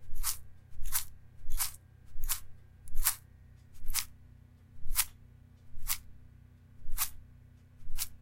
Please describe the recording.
Sand Step
Stepping in sand
Dust, Sand, Step